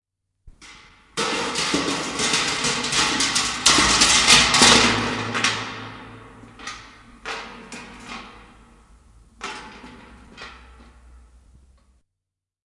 Ämpäri alas rappuja / Metal bucket rolling down the stairs in the staircase, echo
Metalliämpäri pyörii alas portaita rappukäytävässä. Kaikua.
Paikka/Place: Suomi / Finland / Helsinki / Yle
Aika/Date: 1975